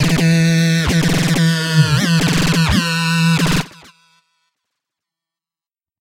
THE REAL VIRUS 13. - GUNLEAD E4
A lead sound with some heavy gun fire effect. All done on my Virus TI. Sequencing done within Cubase 5, audio editing within Wavelab 6.
gun, multisample, lead